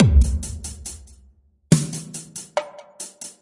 70 bpm drum loop made with Hydrogen
electronic, beat